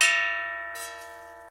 Large bell type hit and slide
hit, metal